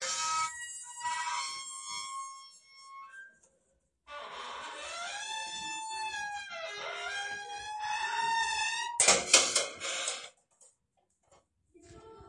Metal Door Squeaking opening and closing pt.3